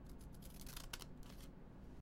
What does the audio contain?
Cutting paper with scissors.
cut, paper, scissors